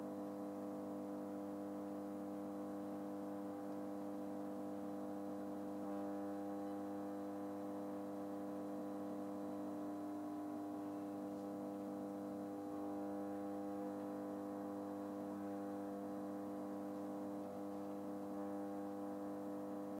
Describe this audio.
Foley Mechanism Light Buzz Short Loop Mono DR05
Loop of an electronic bulb buzzing (exterior).
Gears: Tascam DR05
noise, recording, buzz, lamp, electric, tube, fluorescent, light, buzzing, loop, flu, hummin, street, neon, bulb, electrical, hum, field, electronic